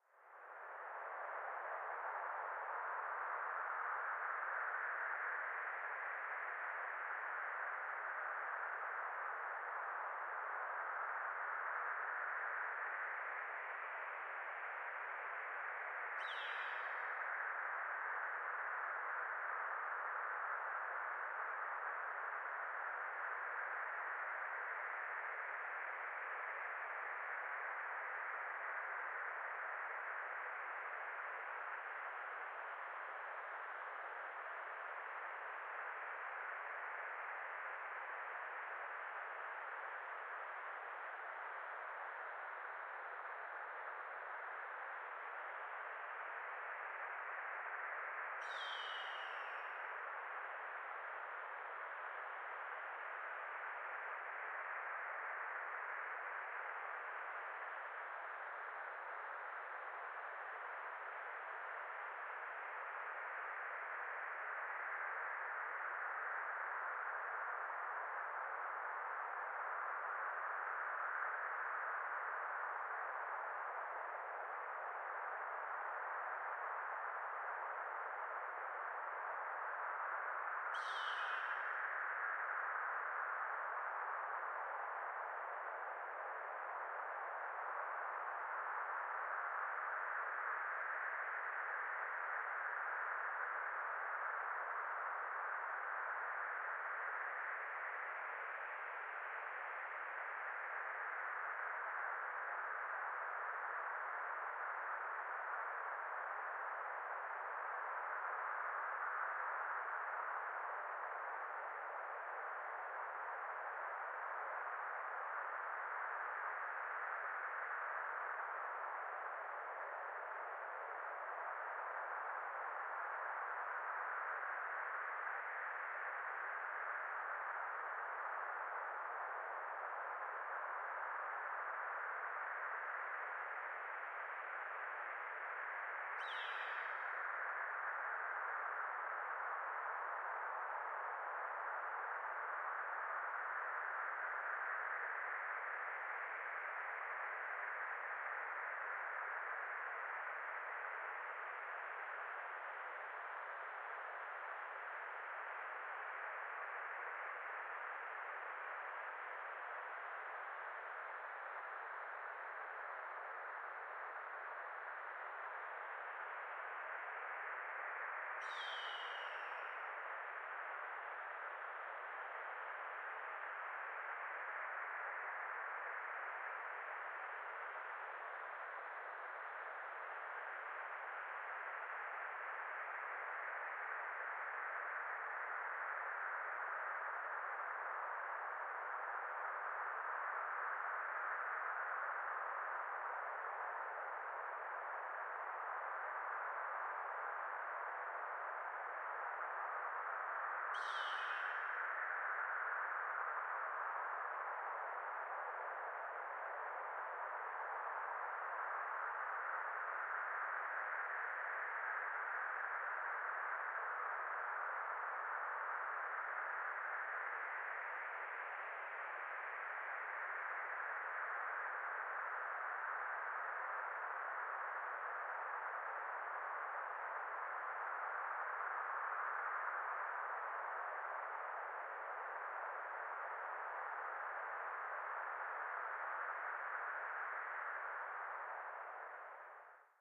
wind-noise-hawk
A clean simulation of Wind blowing, made by filtering white noise.